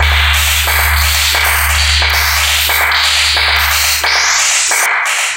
Synthetic machine.Can be looped.Stereo
Synthetic, Factory, Machine